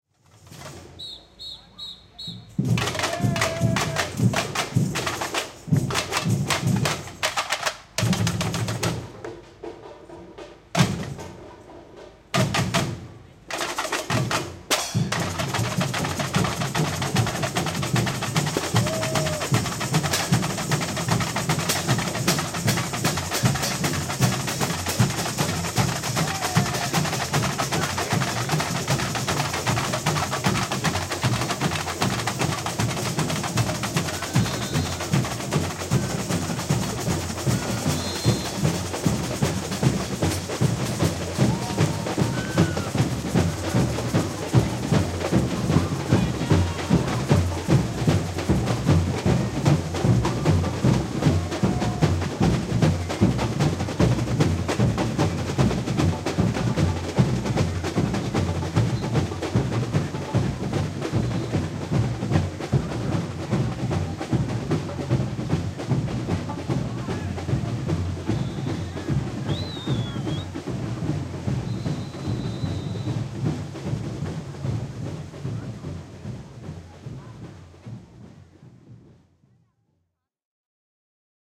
batucada carnival Berlin
Samba batucada at the Berlin Carnival of Cultures (Karneval der Kulturen). Zoom H2